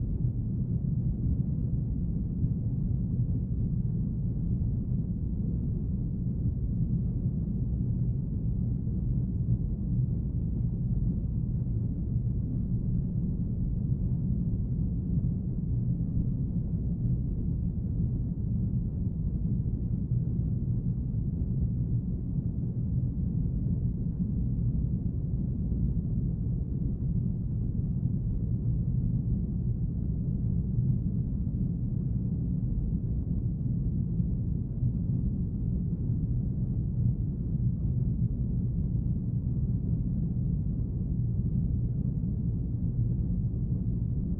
rumble low water gushing movement
gushing, low, movement, rumble, water